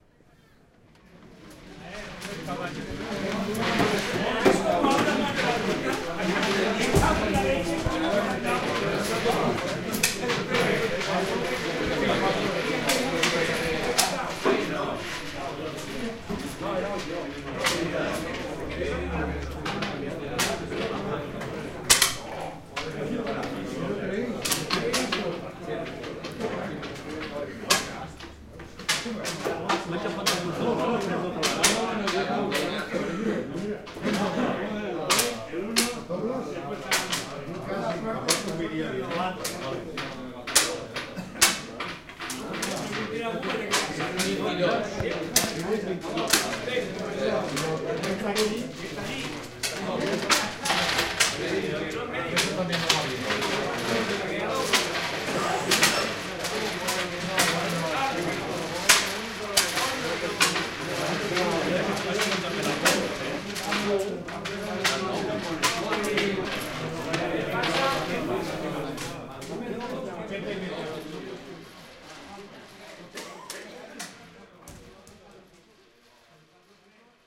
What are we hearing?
Sound recorded during the main festivities of Sant Andreu district in Barcelona. A room full of seniors, male, playing domino, at the Swimming club of the district. Recorded with Zoom H2.